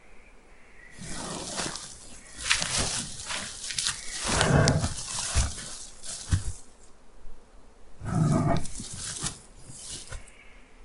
move and growl grizzly bear
sounds of a grizzly bear moving in the yellowstone national park.
animal, bear, best, grizzly